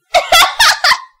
i recorded my voice while watching and listening to funny stuff to force real laughs out of me. this way i can have REAL laugh clips for stock instead of trying to fake it.
talk, english, girl